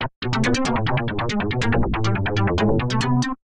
More than a feeling, a twisted feeling.
The stabs, the hits, the bass, the feeling.
140bpm